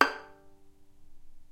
violin pizz non vib G5
violin pizzicato "non vibrato"